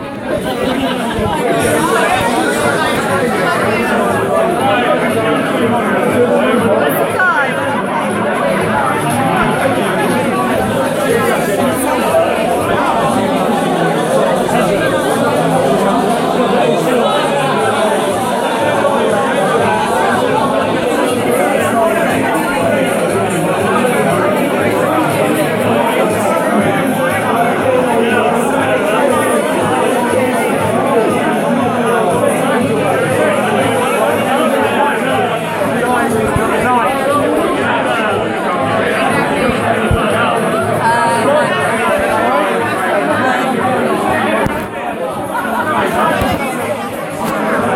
Crowd recording
Freemaster
ambient, busy, crowd, field-recording, people, talking, voices